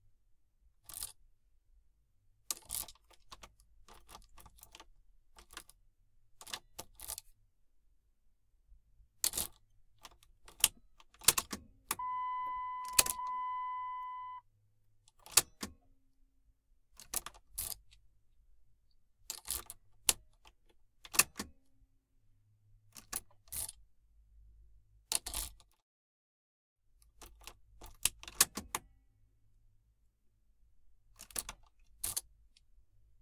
Auto Keys In Out Lock
Car key going in and out of ignition
Auto, Car, Keys, Lock